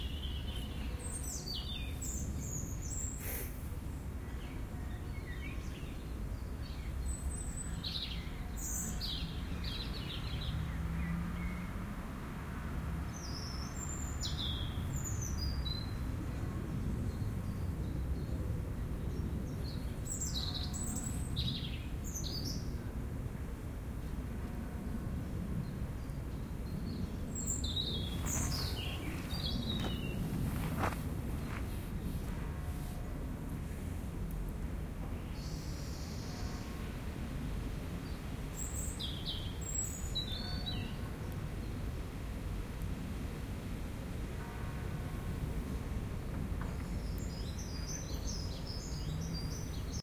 I'm sitting on a bench in the "Hortus Botanicus" in Amsterdam sketching in my sketchbook meanwhile recording the noise around me with my Edirol R09 next to me. It is the 26th of may 2007 and exactly (according to the Zuiderkerk) eleven o'clock.
bird, churchbells, field-recording, human, nature, street, street-noise, traffic